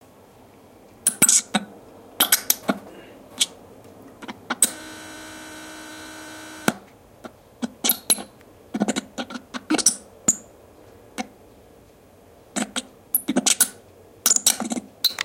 Satellite bad signal
Satellite - bad signal
Satellite, bad, signal